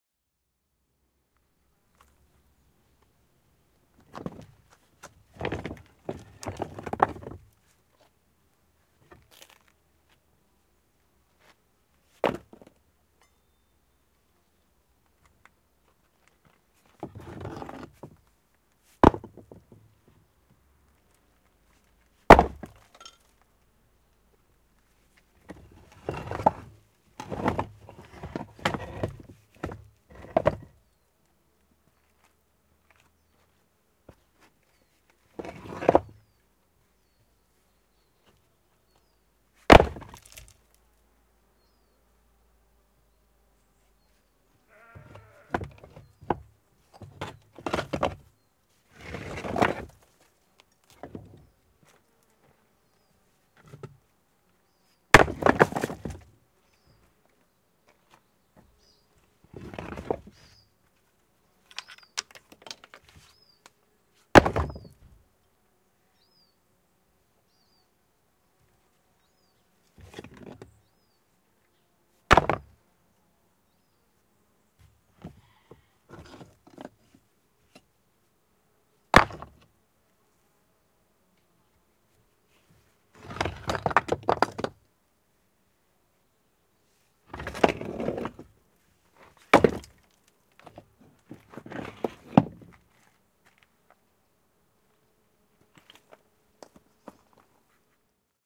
Sorting Stones
A stereo field recording of sorting a pile of building stones to facing stone and fillers. Alternative title "Crap pile of stones". Rode NT-4 > FEL battery pre-amp > Zoom H2 line-in.